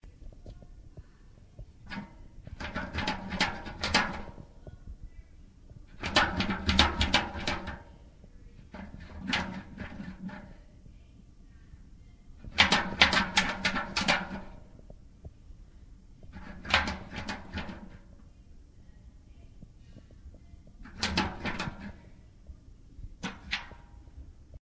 Recorded outside, rattling a metal bucket by the handle.
field-recording, metal, rattle